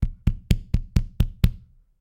window hit
Thumping the window of a heavy door